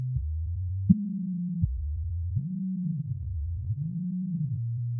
I took some waveform images and ran them through an image synth with the same 432k interval frequency range at various pitches and tempos.
image, synth, loop, pattern